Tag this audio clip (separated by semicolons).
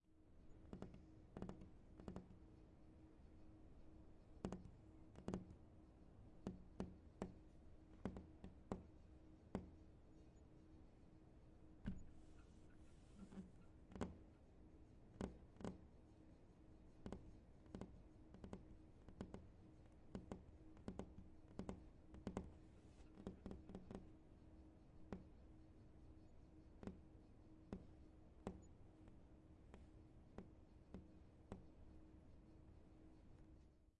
fingers; impatiently; OWI; table; tapping; variations